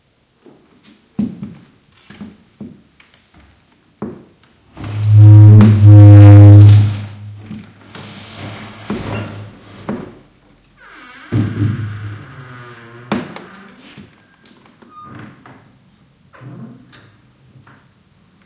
20190101 heavy.door82
Close take of a sagging door that rubs the floor. EM172 Matched Stereo Pair (Clippy XLR, by FEL Communications Ltd) into Sound Devices Mixpre-3 with autolimiters off.